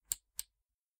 Button Click 08
The click of a small button being pressed and released.
The button belongs to a tape cassette player.